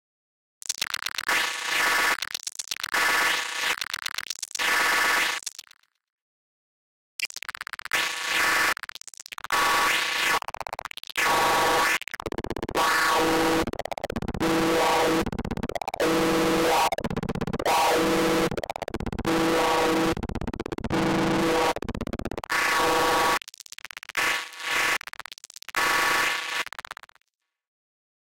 A truly horrible collection of spitting growling electronic noises. Part of my Electronica pack.
120bpm, drum, electro, electronic, electronica, music, noise, percussion, processed, rhythmic, synth